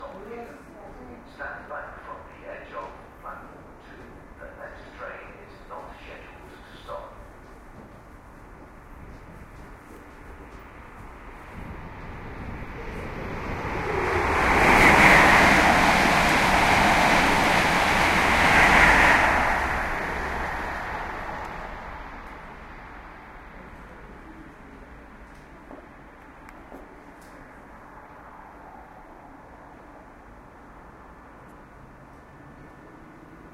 a short recording of a fast moving train passing by a platform somewhere in england
announcement, automated, england, platform, railway, station, tannoy, train